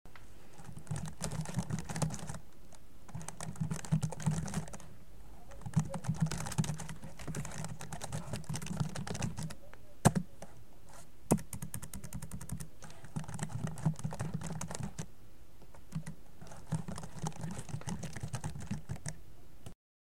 This is just some random typing on a Acer Aspire One Netbook.
i recorded it with a Logitech HD 720 P Webcam on a Apple Mac mini.